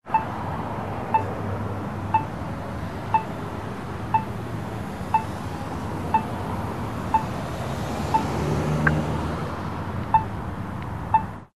Crosswalk Signal
crosswalk, oregon, pdx, sound, sounds, soundscape